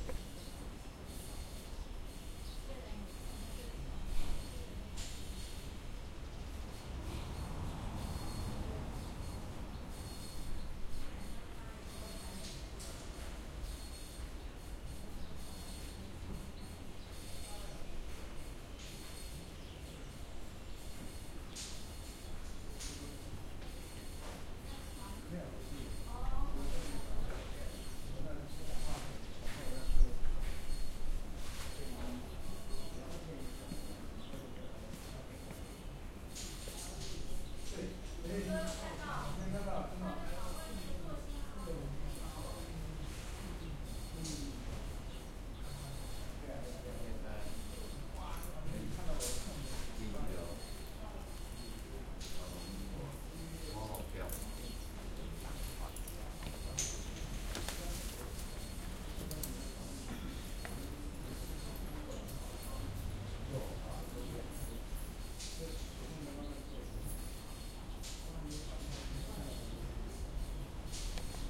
taipei temple billmachine
a bill machine in a temple in taiwan making weird sounds